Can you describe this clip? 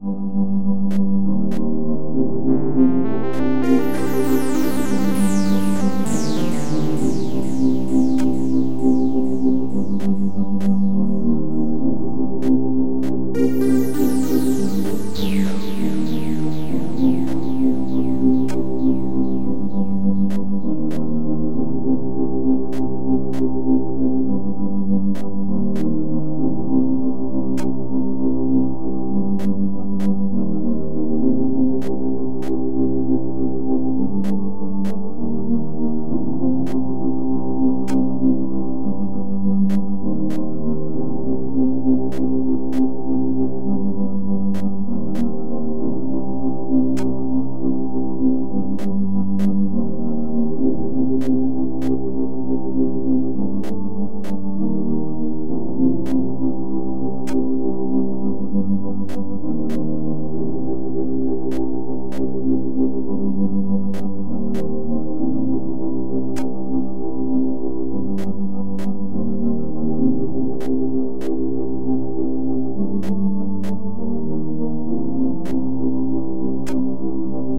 Lost Moon's -=- Cosmic Forest
A loww-gravity piece of relaxing work from a while back..